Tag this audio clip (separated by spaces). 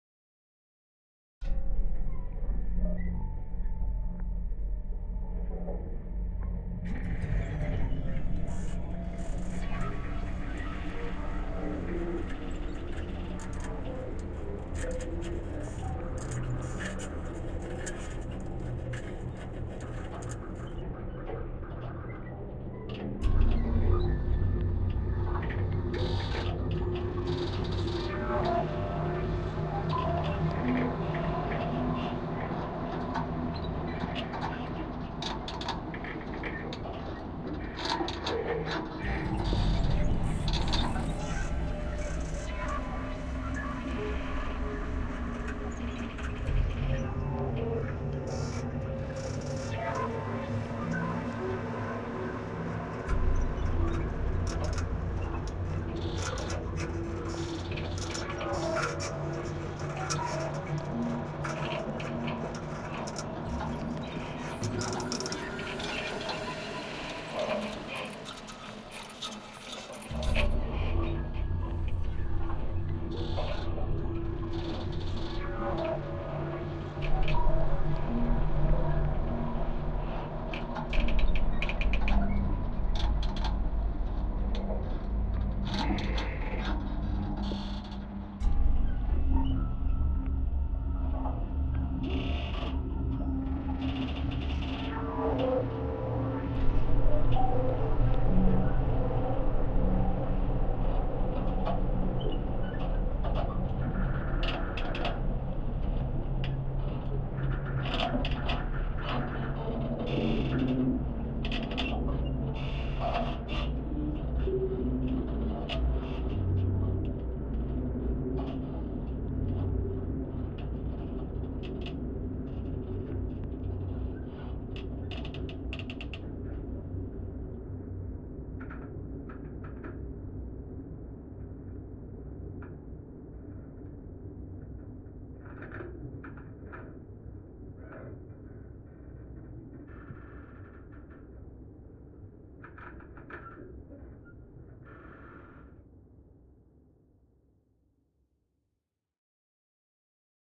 abstract cable soundscape creaking metal steel